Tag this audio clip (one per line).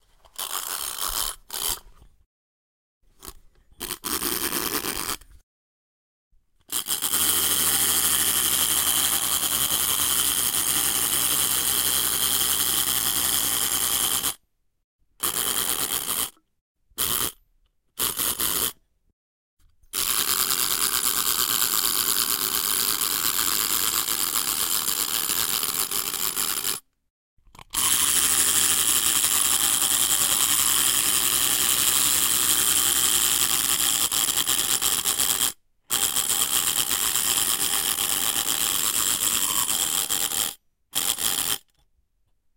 metal
metallic
spring
tin
toy